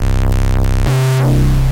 140 Derty Jungle Bass 01
dirty grime bass
drums, filter, free, guitar, loops, sounds